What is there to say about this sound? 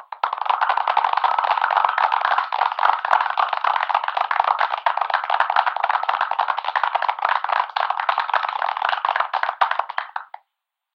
Me applauding 10 times, then layering each of the recordings in Audacity, recorded using an iMac's built in microphone. Then, processed (using iZotope's Vinyl: wear 60%, dust -5.5dB, year 1930) to sound vintage.
1930s, applause